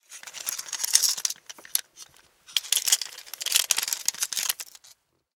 Studio recordings of handling a small metal mechanical device for foley purposes.
Originally used to foley handling sounds of a tattoo machine, but could also be used for guns, surgical instruments etc.
Recorded with an AT-4047/SV large-diaphragm condenser mic.
In this clip, I am handling the device, producing diverse clinks and ratcheting sounds.